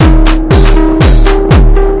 Dusty Piano Loop 3
I little loop series with a piano. These ones are really fun. I made it with Digital Thunder D-lusion. DT is an analogue drum machine.
experimental, loop, novelty, quick, upbeat